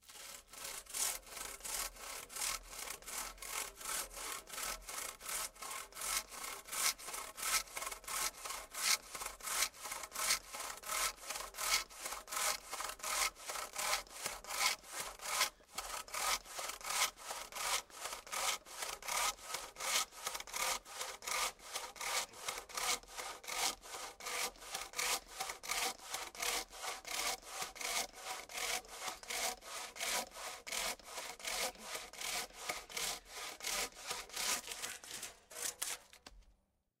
hand saw or hatchet